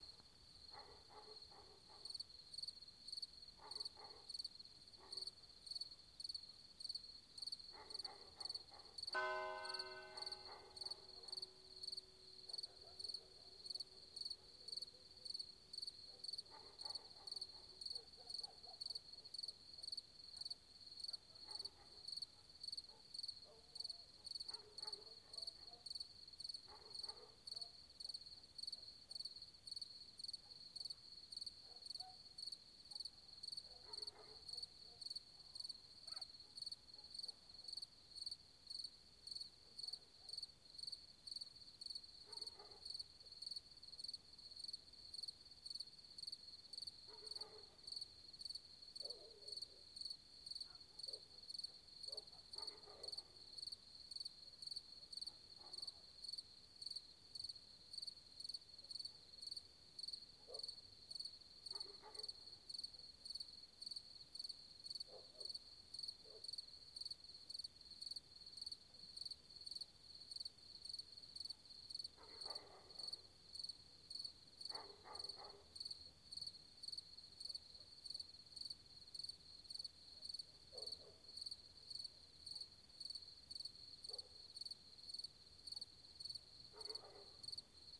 Carrapichana - Night ambiance Crickets Dogs Church
Night ambience recorded in Carrapichana (Celorico da Beira) with a tascam DR40. Crickets, dogs barking, curch bell. I believe that are crickets, please correct me if i'm wrong.
ambiance, barking, background, ambient, night, dogs, field-recording, ambience, celorico-da-beira, carrapichana, church, bell, portugal